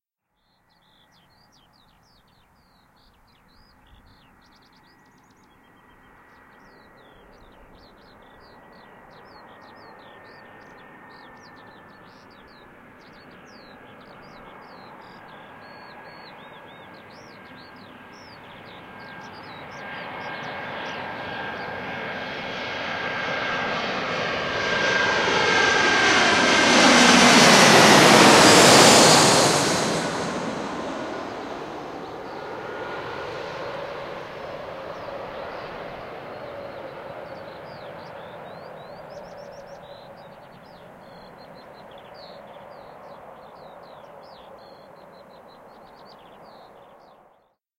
Airplain landing
The recording was made on 30. 05. 2022. in Budapest, Liszt Ferenc International Airport. Not the best quality but usable.